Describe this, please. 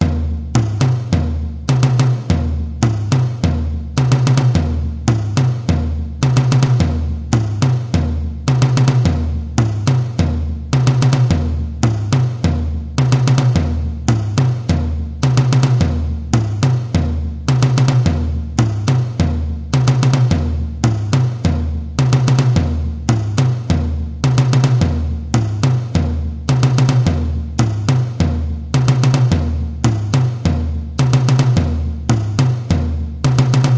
Samba reggae - Track 1
Typical Samba Reggae pattern for the surdo using music studio and app for the itouch and iPhone